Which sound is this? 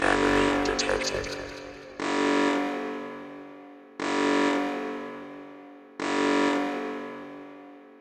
Sound of an emergency shut-down on a spaceship. A robotic voice is saying "enemy detected" attended by a brutal alarm sound. Maybe in a wide room or hall. I created this sound by using the fl studio speech synthesizer plus several effects. Maybe useful in a video game or a short animation movie ;-)